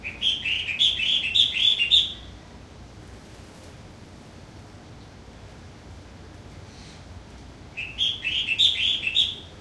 collared finchbill01
Song of a Collared Finchbill, recorded with a Zoom H2.
aviary, bird, birds, bulbul, exotic, field-recording, finchbill, songbird, tropical, zoo